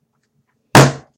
Slamming on Wooden Desk
The act of slamming hands down on a wooden desk in anger or to reach someone from the other side.
desk, timber, wood